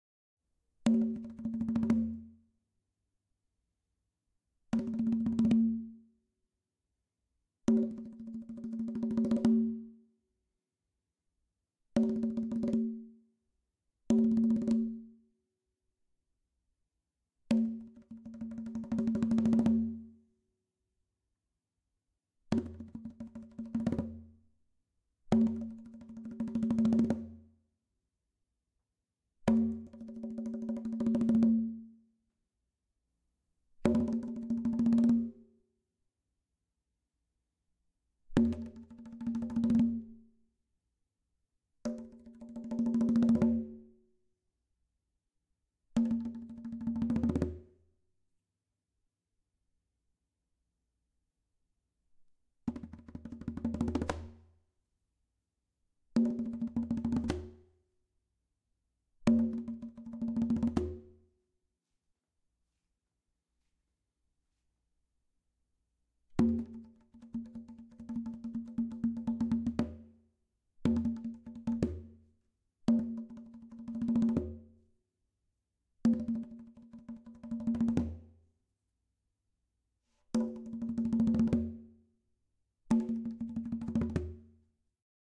Conga rolls. Recorded many in succession, easily edited. Recorded in small room, Rode NT1A and AKG C1000S used. Sorry if the rolls aren't very good, I'm not much of a percussionist! Enjoy.